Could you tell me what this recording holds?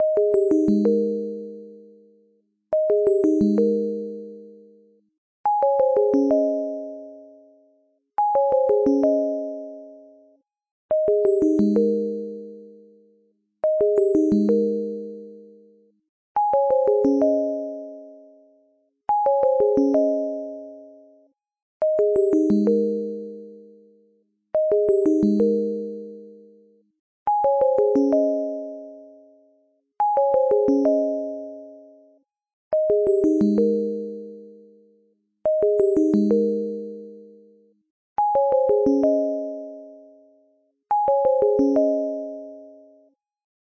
Pleasant pluck Dry
88-bpm, loop, melodic, pleasant, pluck, sinus